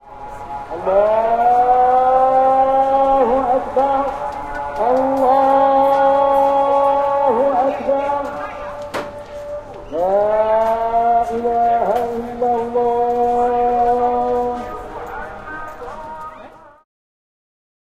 Mosque Recorded in Marrakesh.
Recorded with a Sony PCM D50